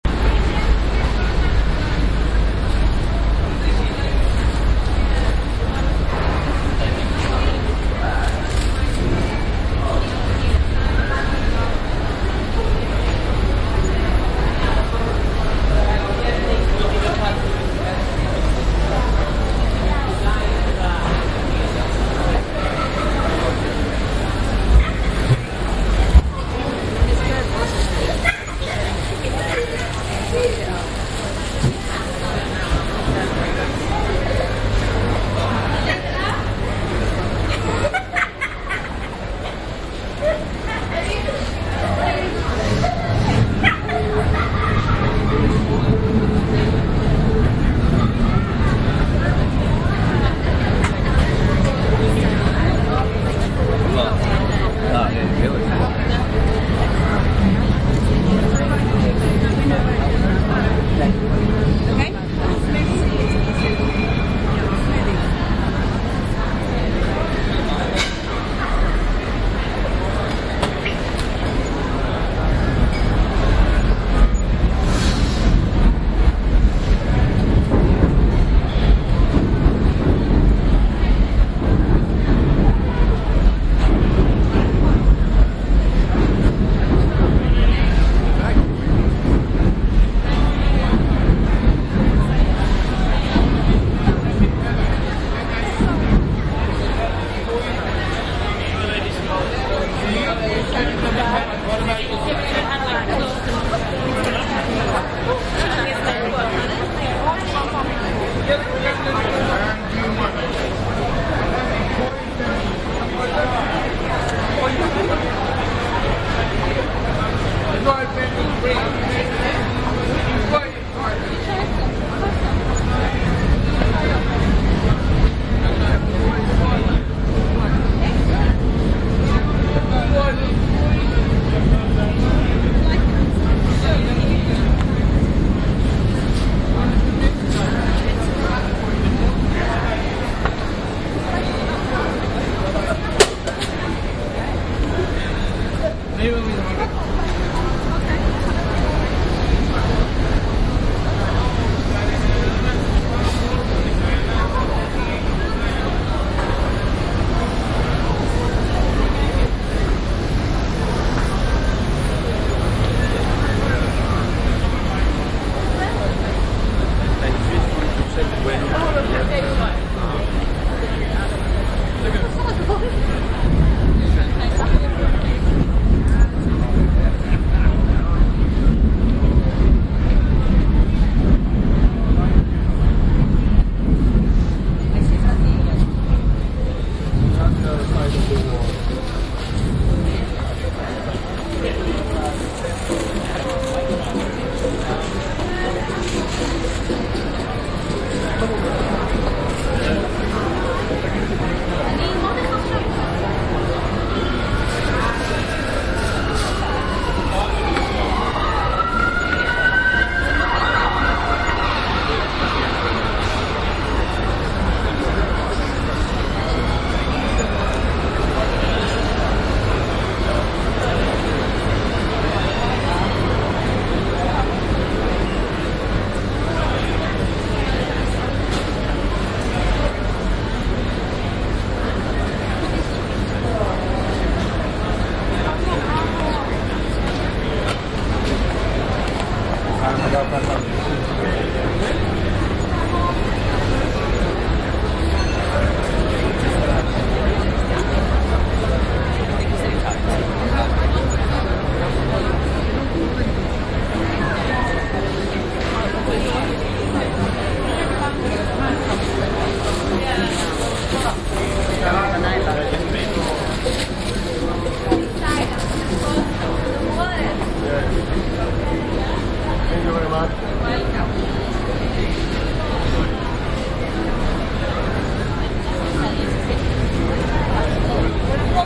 Borough - Market
ambiance, ambience, binaural, department, field-recording, london, store